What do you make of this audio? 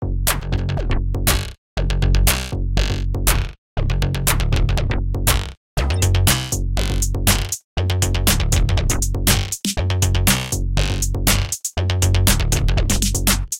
Metallic Beat 2
A complex beat that sounds metallic.